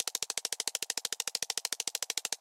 Roulette Wheel Spin Loop 1 1
Created using layering teching with Synth1 virtual synthetizer. Edited and mixed in DAW.
Life, Roulette, Game, Gambling, Spin, Wheel, Loop, Luck, Board, Show